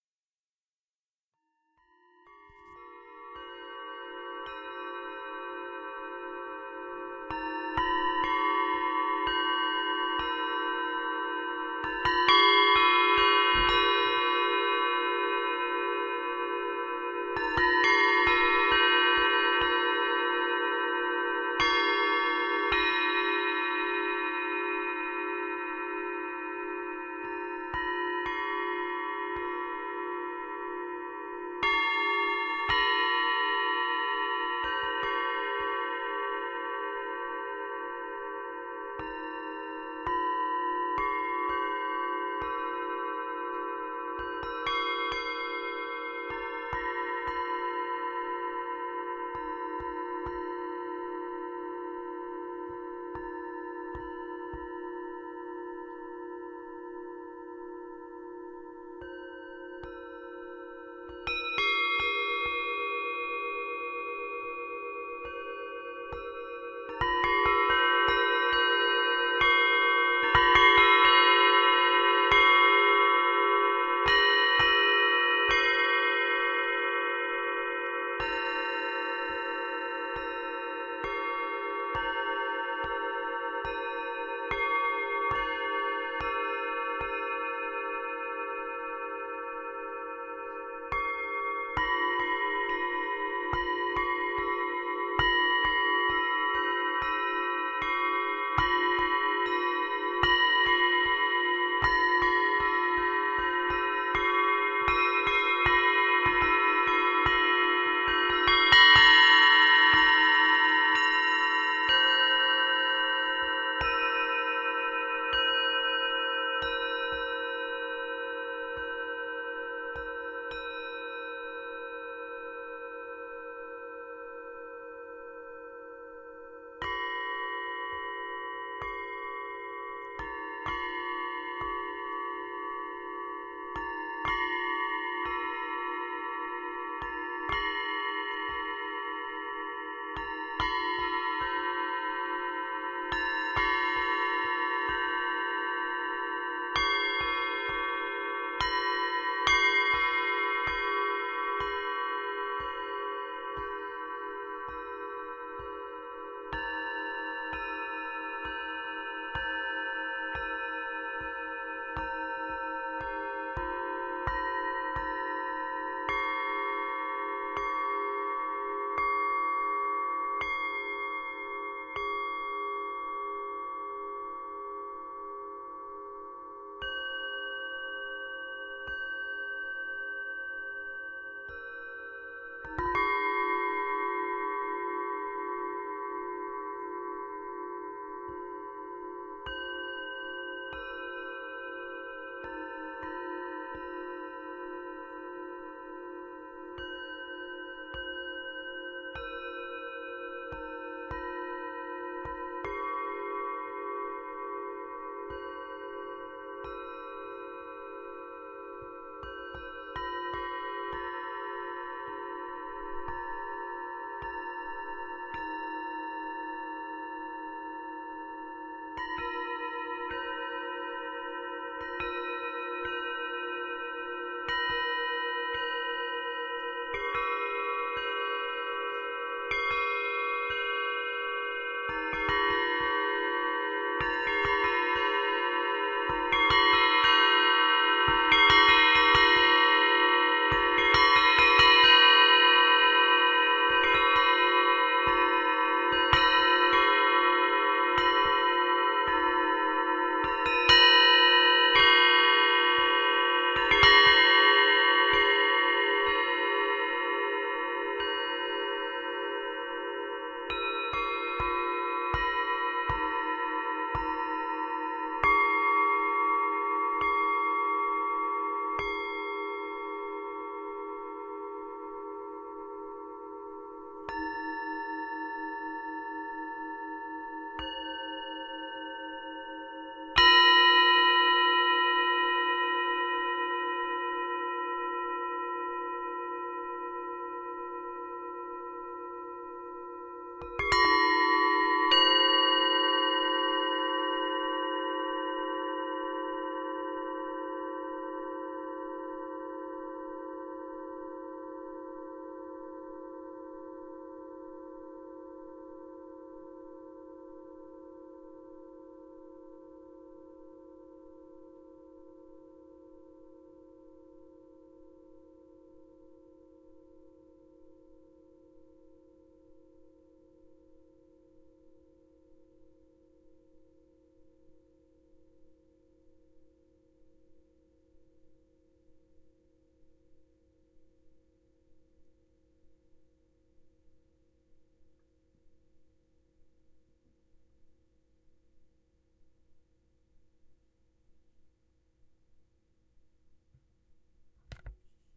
Pentatonic Singing Bowl Scale Demo
A demo of the pentatonic-scale set of singing bowls whose samples are contained elsewhere in this sample pack. Demo is performed and recorded by myself, as are all the other samples in this pack.
The basic scale is demonstrated at a few speeds, and various 'intervals' (seconds, thirds, fourths) are also demonstrated.
Lastly, various patterns/rhythms are demonstrated.
Scale was assembled by myself with old 'cup thado' Himalayan singing bowls in my collection.
music, sacred, tibetan, pentatonic, singing-bowl, bowl, meditation, demonstration